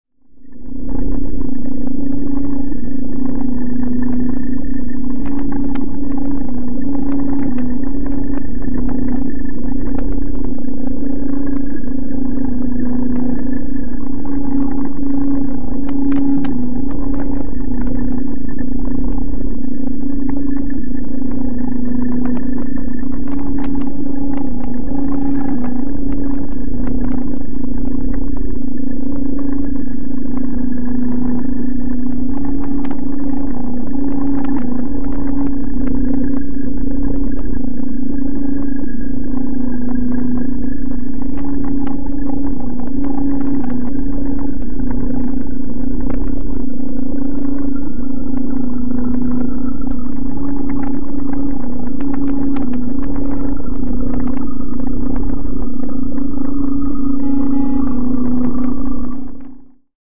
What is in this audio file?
One of four somewhat related sounds, somewhat droning, somewhat glitchy. It's late, I hit record, the red light scares me.
ambient, delay, drone, experimental, glitch